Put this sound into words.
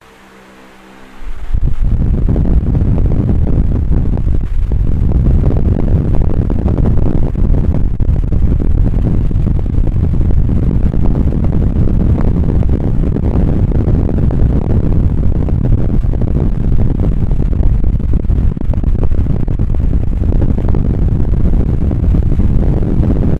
Fan Blowing
Blowing of a fan
Fan, Blow, Blowing-fan